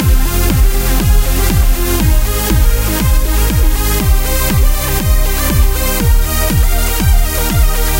Energetic Dance
Electro Dance thing. Loop was created by me with nothing but sequenced instruments within Logic Pro X.
dance, edm, electro, epic, intense, loop, loops, music, song, synth